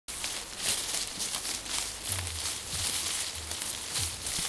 LEAVES CRACKLING
leaves autumn crackling